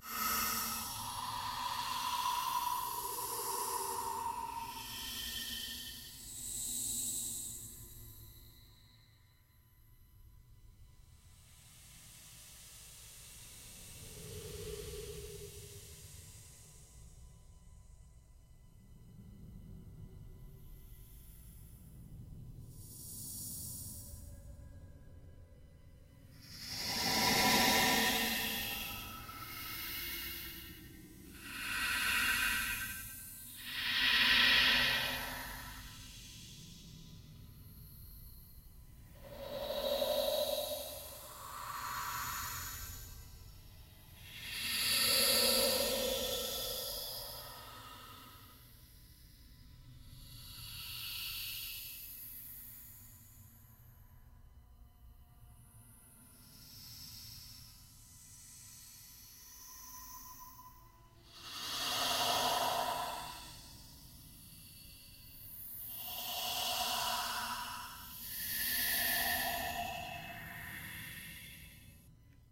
Ambient Horror Hiss
This is a decent ambient horror sound. Maybe the sound of dead souls whispering.
Created using the 'Paulstretch' effect in Audacity on the sound of water droplets.
Recorded on a Yetti Blue USB Microphone. 2015
Spooky, Scary, Ambient, Static, Atmosphere, Creepy, Hiss, Horror, Background, Dark, Terror